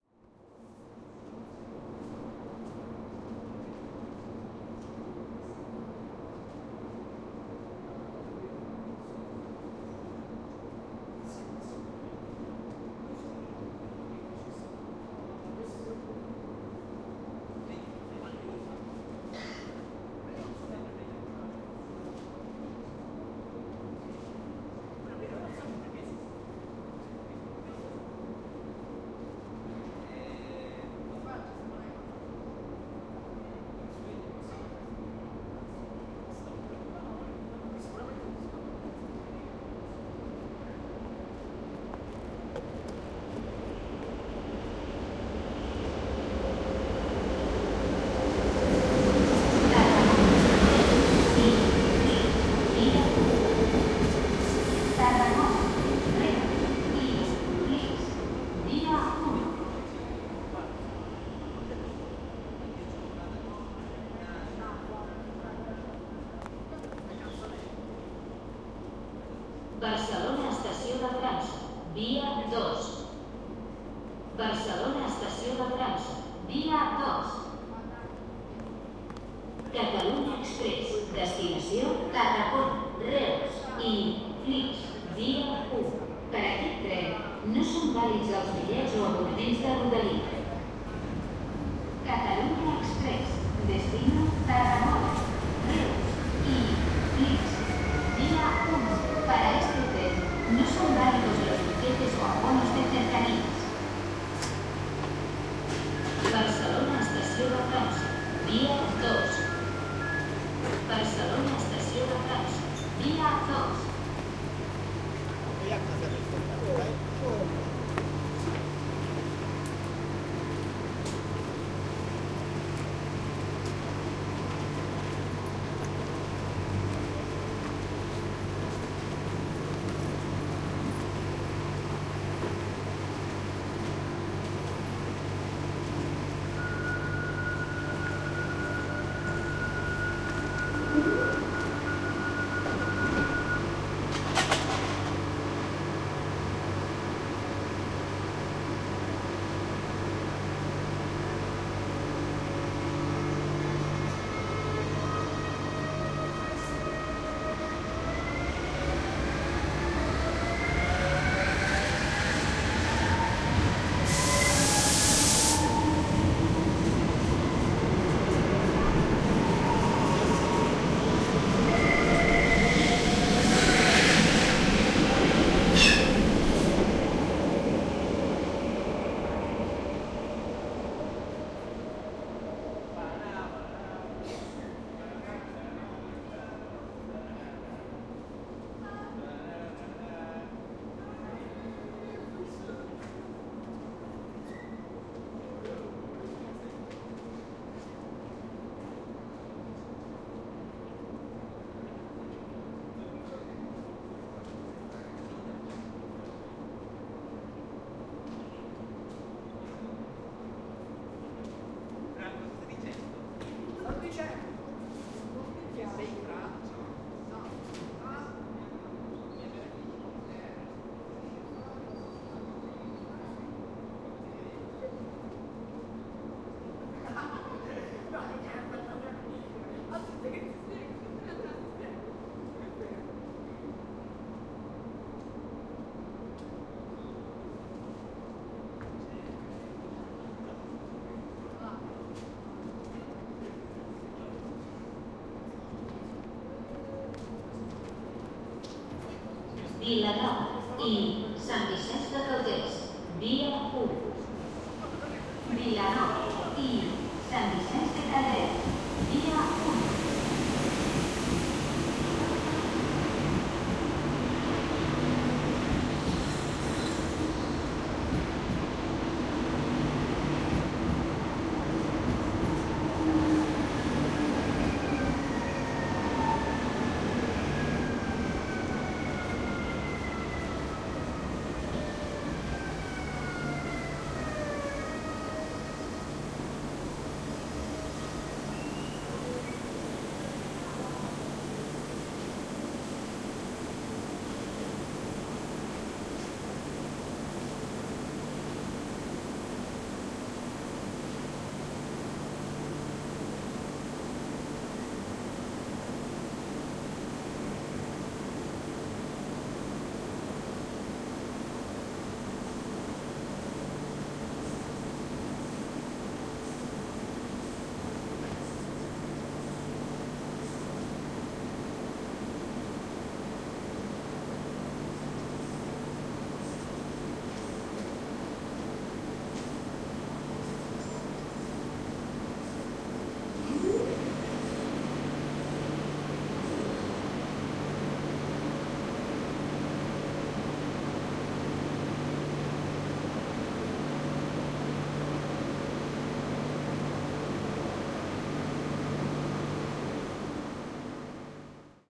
passeig de gracia station 1
Field recording taken at Passeig de Gràcia train station in Barcelona. Recorded with a Zoom H2.